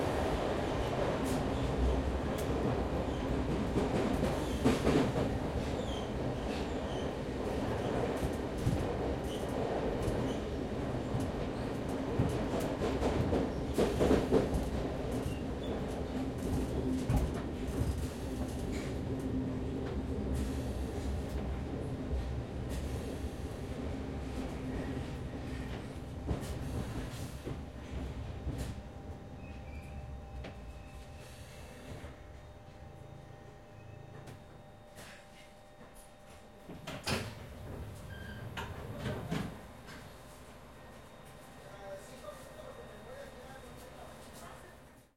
Train Tube Int Slow Down Doors Open
Slow, Tube, Down, Undergound, Open, Doors, Train